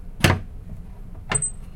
Squeaking Bolt 1
Just squeaking bolt. Nocing special
steel, clang, metal, bolt, iron, metallic, squeak